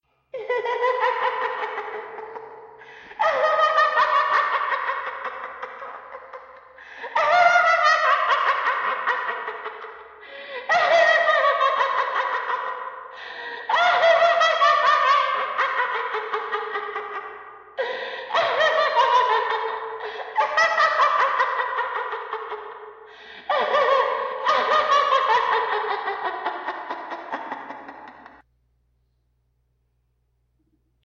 Yandere laughter
here is my rendition of a crazy yandere type laugh. With echo to make it a little more spooky.
yandere, anime